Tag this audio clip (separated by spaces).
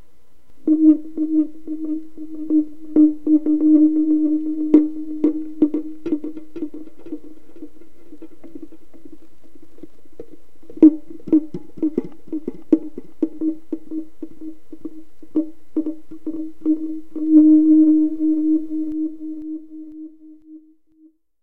meditation experimental noise creepy flute mellow scary feedback